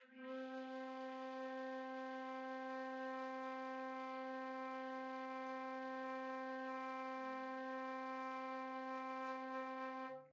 One-shot from Versilian Studios Chamber Orchestra 2: Community Edition sampling project.
Instrument family: Woodwinds
Instrument: Flute
Articulation: non-vibrato sustain
Note: C4
Midi note: 60
Midi velocity (center): 95
Microphone: 2x Rode NT1-A spaced pair
Performer: Linda Dallimore
flute, vsco-2